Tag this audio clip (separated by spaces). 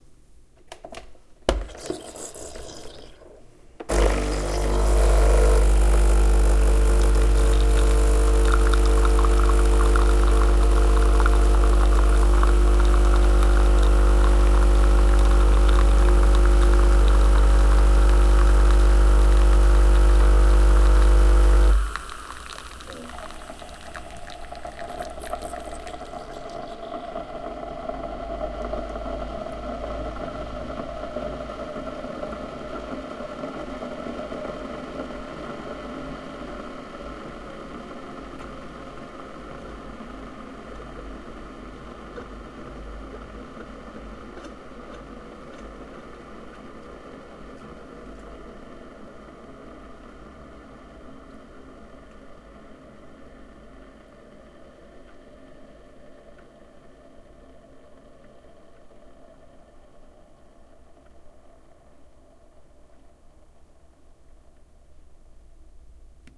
brew coffee percolateur